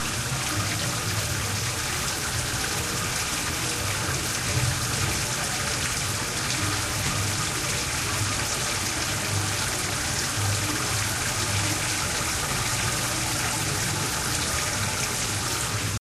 zoo watertable
Walking through the Miami Metro Zoo with Olympus DS-40 and Sony ECMDS70P. A flat metal table with a drain, demonstrating some physics of water.
animals, field-recording, water, zoo